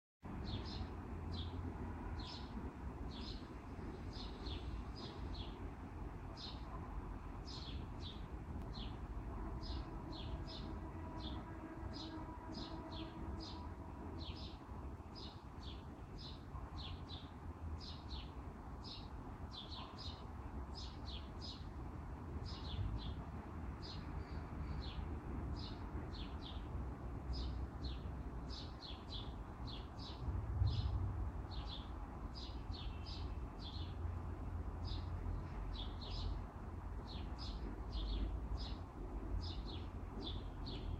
Pajaros (birds)
Pajaros grabados en el contexto de la ciudad de buenos aires.
Bird recorded in the city of buenos aires with a portable device.